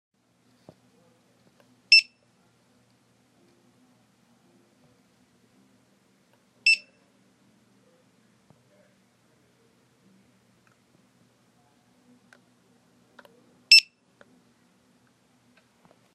Scanner beeping sound
Scanning barcode with a scanner in a storage closet
supermarket, office, scanner, radio, scan